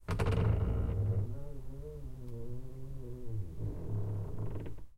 Opening an old noisy door carefully.